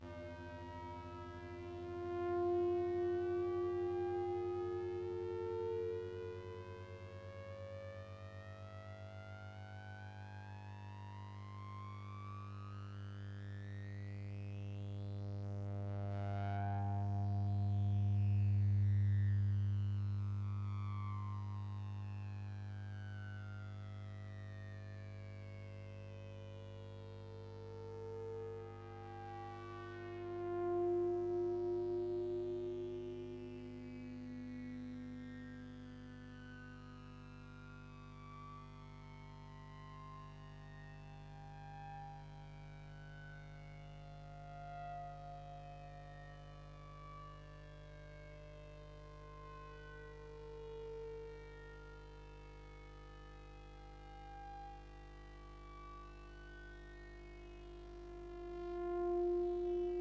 Intergalactic Sound Check 04
an ambient synth sound: programmed in ChucK programming language. it sounds Sci-Fi & rising.